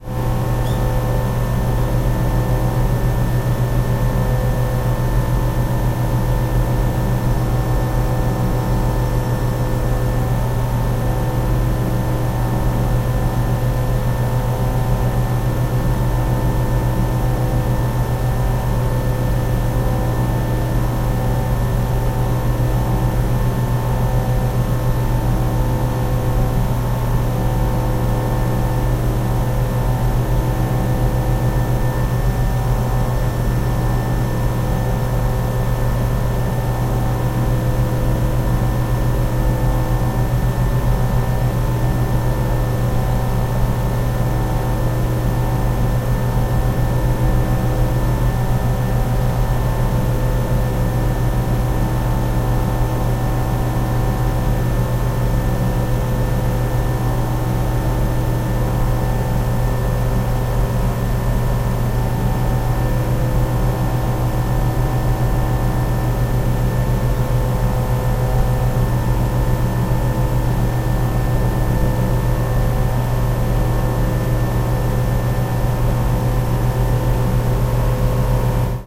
0057 Air conditioner and birds
Air conditioner device with birds.
20120116